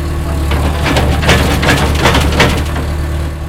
One of the many sounds from my Farmyard and factory machinery pack. The name should speak for itself.